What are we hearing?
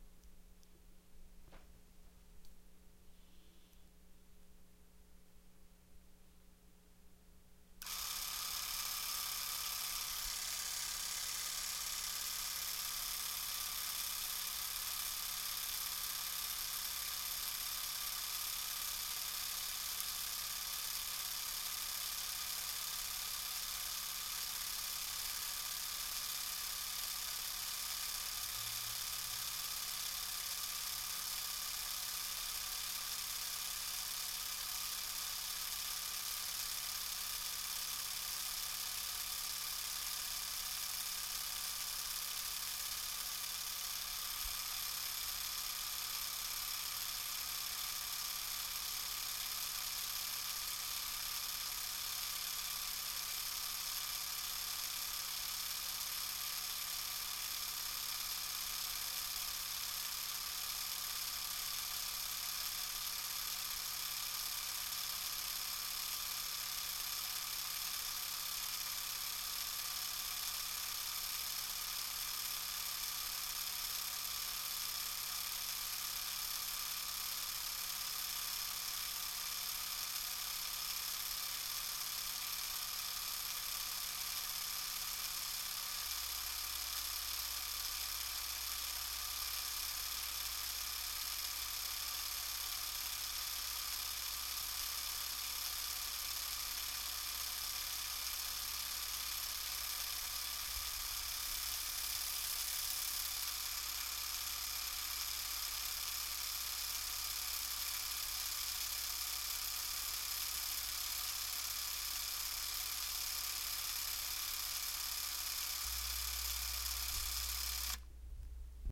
sound of super 8 camera
This is the sound of my Eumig Nautica Super 8 camera without film cartridge running at 18fps recorded with an old Sony mic onto a Sony MD Walkman MZ-NH700
18fps, 8, camera, eumig-nautica, field-recording, motor, super, whirring